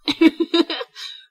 real laugh taken from narration screw ups